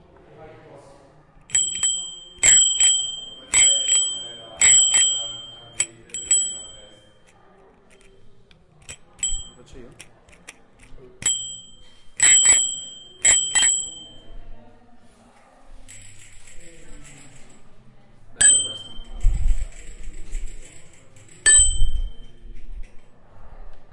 bell 010 metallic
bell, bicycle, bike, cycle, horn, mechanic, metallic